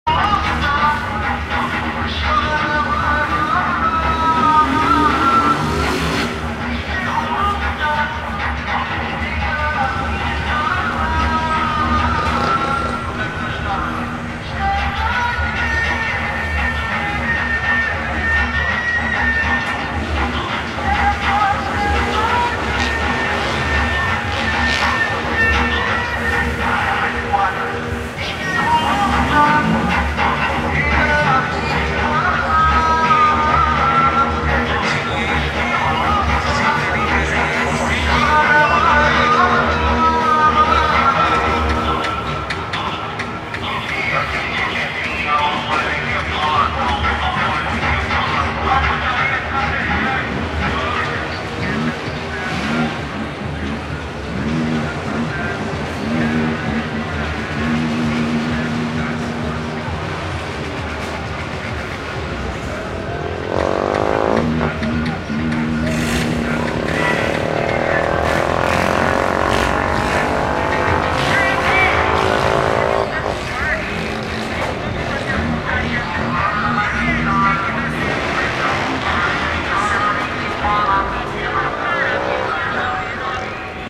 This is the soundscape I've recorded in my neighboorhood of Cidade Tiradentes, a district from São Paulo, Brazil. We name these events as pancadão (big punch) or fluxo (flow). When a flow occurs, you know that the sound is very high and it invades all surroundings.
It happened in April 4, 2021, during our worst period in the the Global COVID-19 pandemic. Plent of people, vehicles, multiple speakers and so on in a street.
I think this is interesting to share it here, as a cultural manifestation, showing that when the state fails, everyone fails. This is disrespectful at all, but I try to look it as a construction of city. In a country where its President goes for a for a swim at a crowded beach amid 200 thousand pandemic deaths, how can I criticize suburban people?
I'm not conservative, I like the kind of music playing known as"Brazilian funk" or "Funk carioca", this is our culture, even if I do not participate actively.
Plot twist: I was working in a ASMR video.